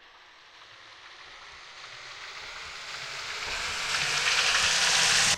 sink tweak
A kitchen sink sample tweaked to sound like a swell.